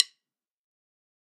Drumsticks [Dave Weckl Evolution] click №4 (loud)
wood, Vater, Oak, maple, metronome, one, one-shot, snare, shot, click, countdown, Pro, Tama, Firth